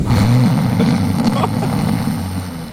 Some kind of nose snorting sound and laughter.
Recorded with Edirol R-1 & Sennheiser ME66.